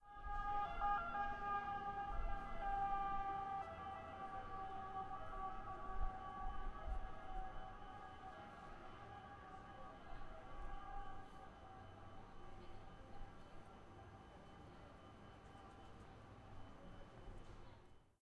One of the very common sounds one hears in Genova. It was recorded from under the highway near the harbor area